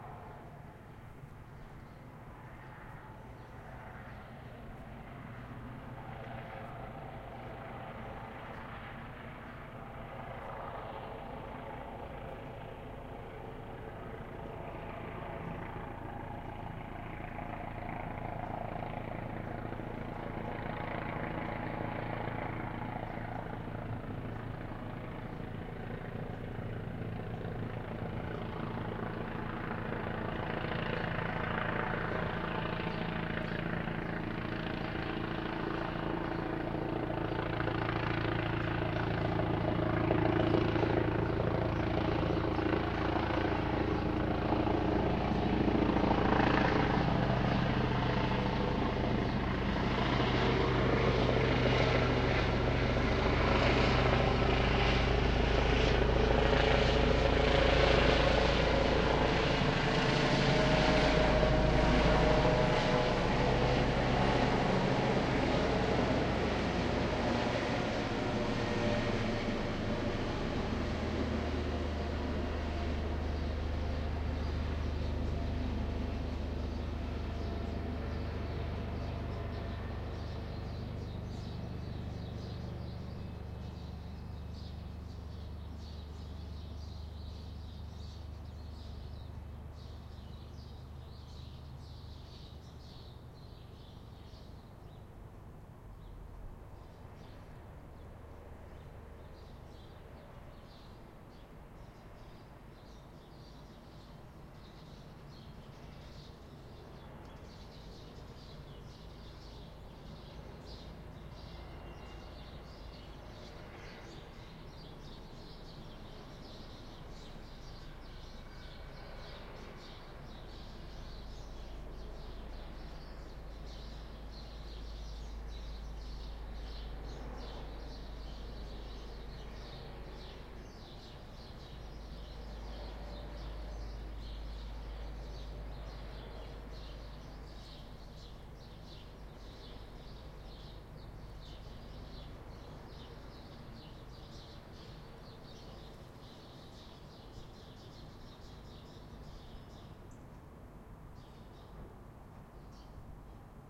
POLICE COPTER L - R BIRDIES IN BG
The same Eurocopter police helicopter going from left to right. There are some birds in the background, and a bit of traffic background.
helicopter, overhead, police